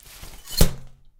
Umbrella open2
Sound of ubrella opening
vol, noises, umbrella, 0, sounds, egoless, natural